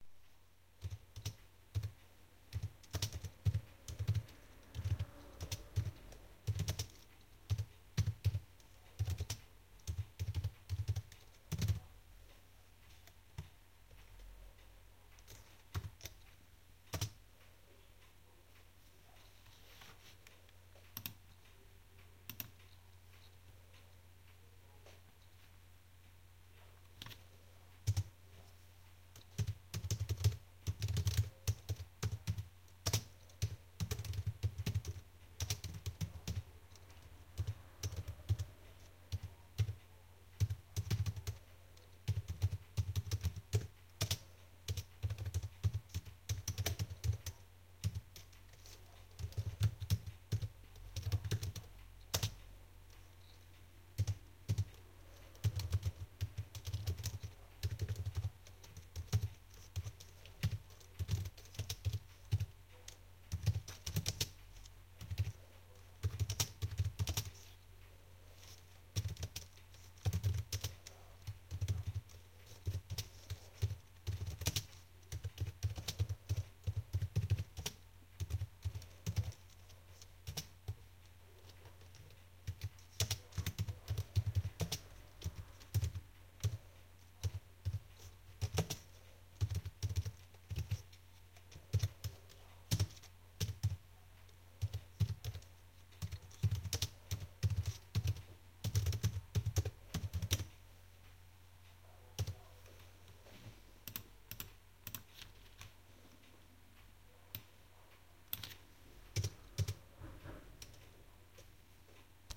typing keyboard
typing at the laptop keyboard.